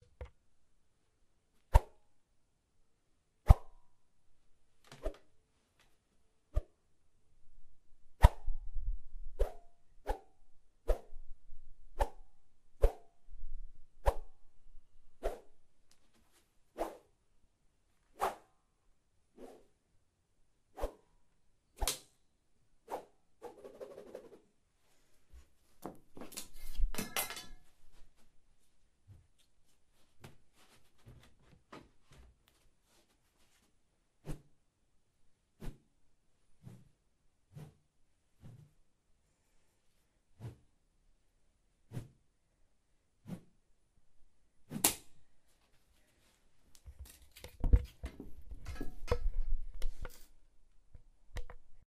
whoosh- fake punch/hit sound
A quick busrt of wind that sounds like a fake punch or fast attack.
Good for comic/film/animation
Sound made by moving thin stick through air very quickly
fast, hit, punch, speed, whip, whoosh, wind